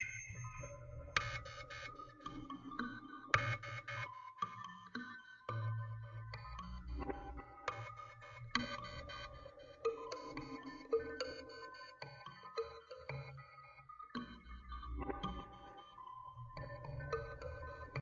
kalimba home made with some delay
kalimba, delay, made, home, ambient, instrument